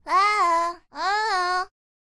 Someone (ugly) saying hey! without words